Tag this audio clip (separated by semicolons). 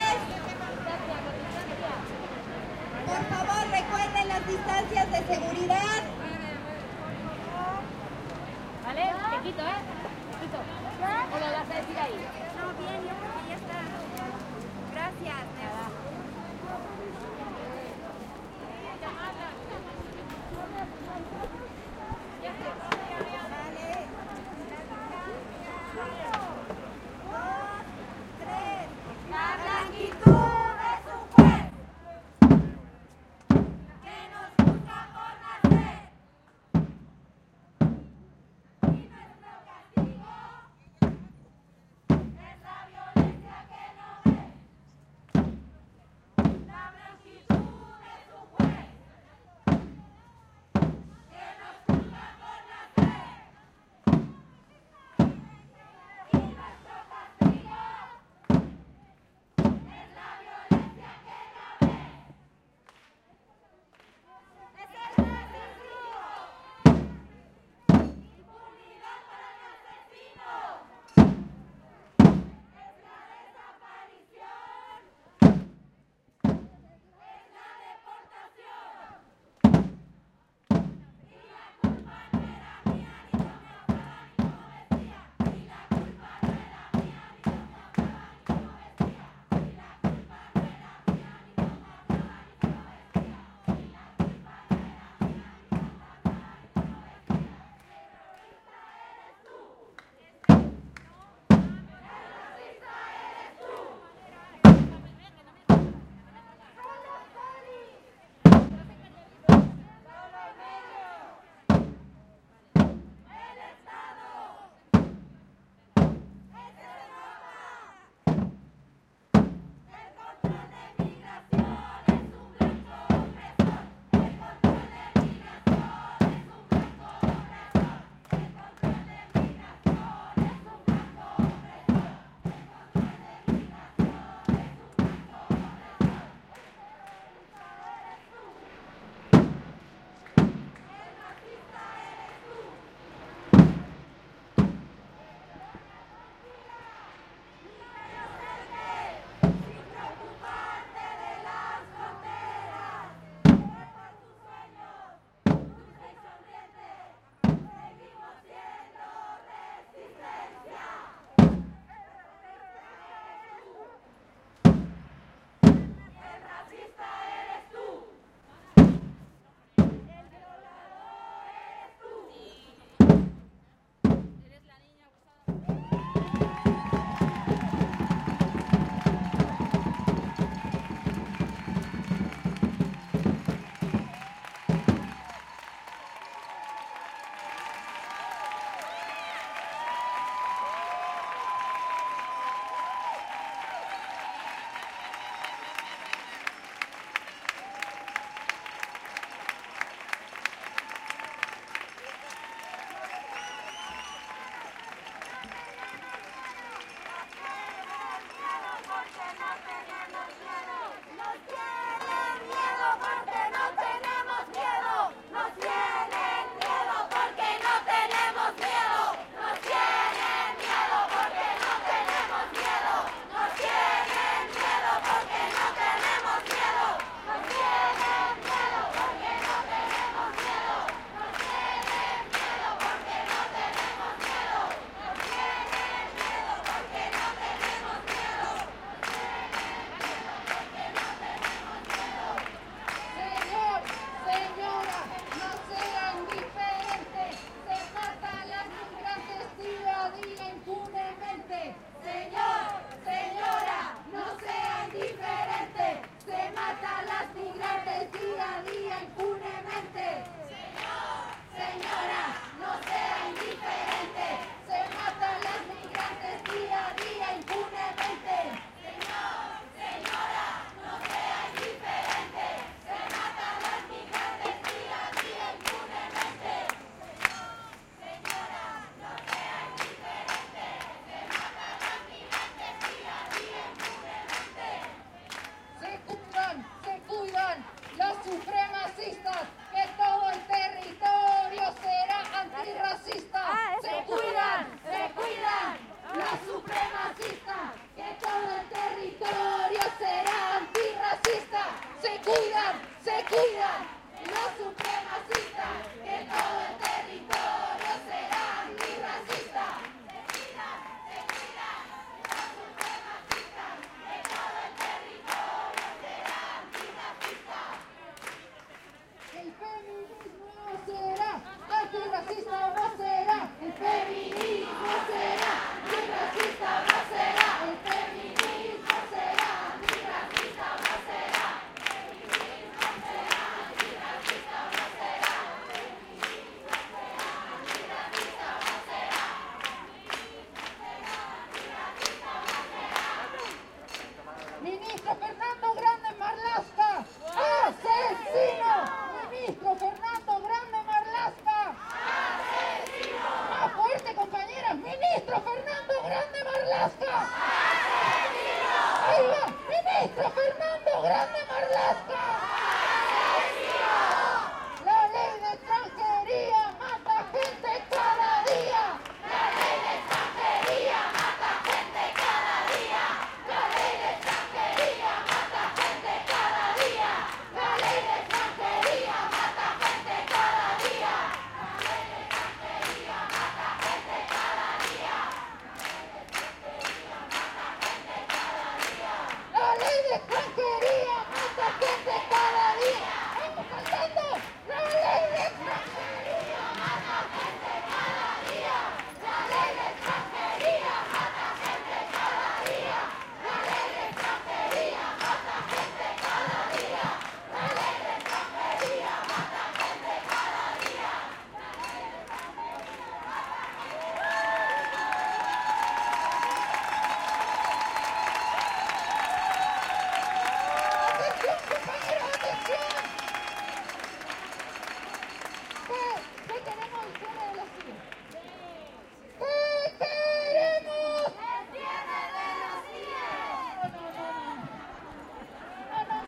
strike; women; street